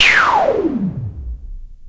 sfx-noise-sweep-03
Made with a KORG minilogue